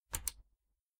Button Click 03
The click of a small button being pressed and released.
The button belongs to a tape cassette player.